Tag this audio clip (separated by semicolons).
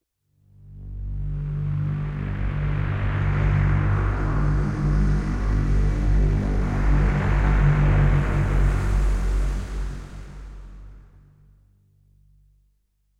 creepy; ghost; haunted; horror; power-up; powerup; rise; rising; scary; spooky